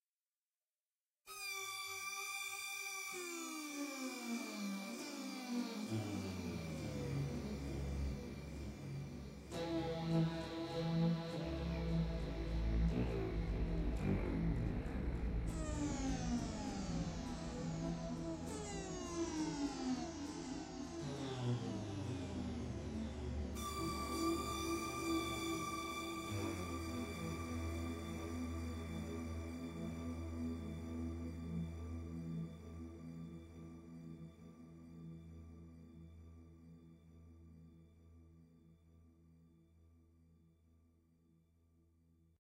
falling, portamento fX sounds created with the Roland VG-8 guitar system